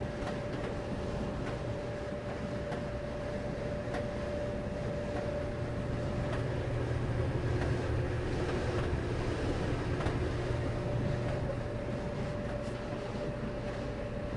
A washer dryer that could be good for machine hum and room ambiance.
machine, room